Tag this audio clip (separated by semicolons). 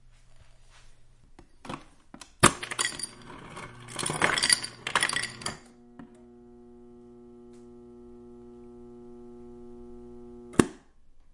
dispense; glass; Hum; ice; ice-machine; machine; mechanical